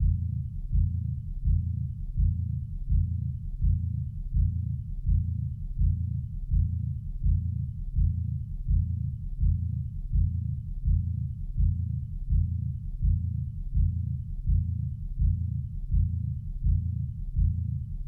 Loop DoomBeat
I had a strange microphone rumble in my recording and I turned it into a loop beat that, I feel, suggests some menacing doom.
loop, thriller, rhythm, beat, scary, microphone, doom